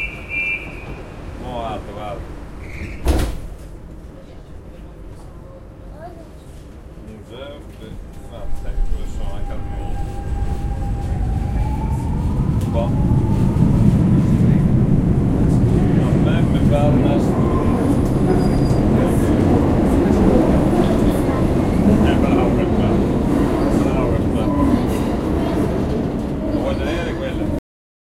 Metro in Portugal, recorded in Zoom H4n